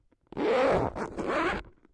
a zipper, recorded with a Zoom H1.
zip, zipper, bag, fastener, clothing